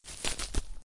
Knight Left Footstep Forest/Grass 5 (With Chainmail)
A chainmail wearing knight’s footstep (left foot) through the woods/a forest. Originally recorded these for a University project, but thought they could be of some use to someone.
foley, foot-step, walk, steps, grass, walking, step, forest, footstep, left-foot, feet, knight, left, foot, chainmail, path, foot-steps, woods, footsteps